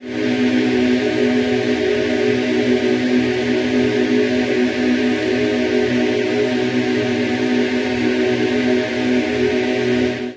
pad gas06
ambient, atmo, dub, gas, pad